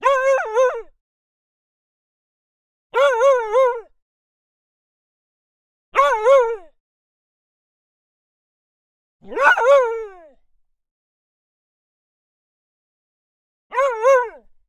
Luna the dog barking (trying to tell me it's her dinner time). Luna is part hound, accounting for her deep hollow yelping.